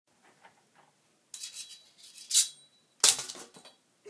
Sword Being Unsheathed
The sound of a sword being withdrawn (with some difficulty) from a sheath, and then accidentally being dropped and hitting the ground. Also sounds like metal scraping metal.
Created by rubbing a scissors against a cheese knife.
impact, sword, fall, clang, sword-falling, metal, clank